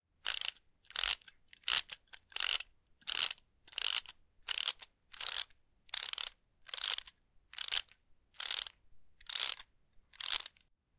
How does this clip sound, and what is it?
Raw audio of a ratchet screwdriver being twisted without the screw attached. I originally recorded this for use in a musical theatre piece.
An example of how you might credit is by putting this in the description/credits:
The sound was recorded using a "H1 Zoom recorder" on 23rd April 2017.